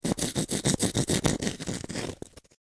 Frottement Cailloux 2
misc noise ambient
ambient, misc, noise